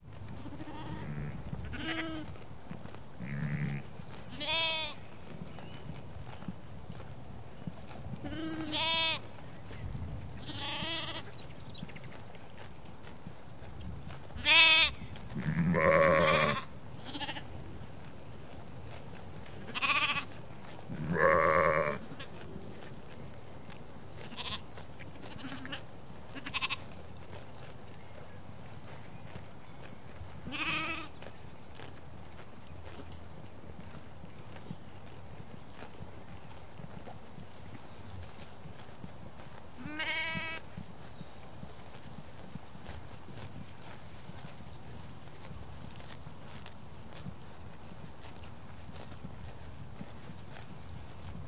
sheep on pasture
A flock of sheep mowing happily on pasture.
countryside, farm, field-recording, nature, sheep, spring